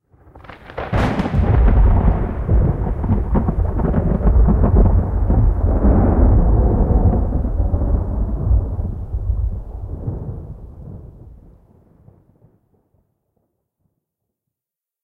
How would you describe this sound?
Lightning strike plus Thunder
This audio clip is a computer generated sound using various synthesizers, and field recordings.
This was edited with gold wave using some spectrum filters and a volume shaper.
This was originally two different sounds mixed and then buffed to get this output.
doors, thunderstorm, nature, Lightning, field-recording, weather, plus, strike, out, sound, Thunder, project